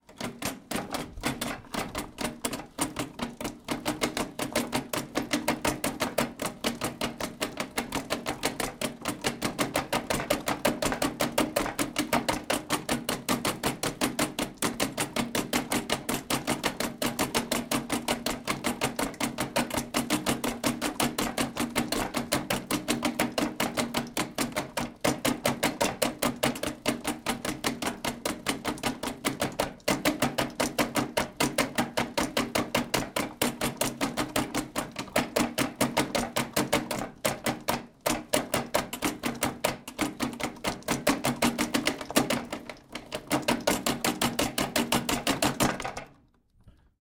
FXSaSc Kettler Kettcar 08 Broken Rattle